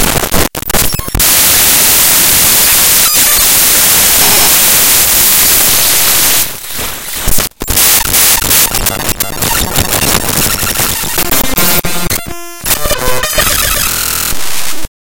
created by importing raw data into sony sound forge and then re-exporting as an audio file.
clicks, glitches, data, raw, harsh